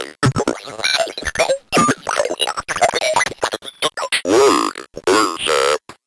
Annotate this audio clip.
PUKE THE WORD ZAP. one of a series of samples of a circuit bent Speak N Spell.
bent, spell, lo-fi, glitch, circuit, circuitbent, lofi, speak